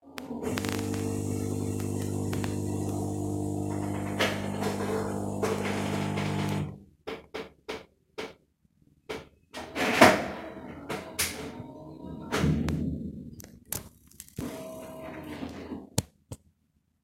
Crappy lo-fi recording of an espresso machine in a corporate kitchen
coffee-machine, espresso, coffee, kitchen